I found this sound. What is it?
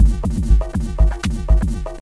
A propelling rhythmic loop